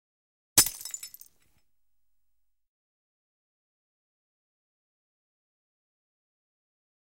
33 Destruction, Mug
voice broke mug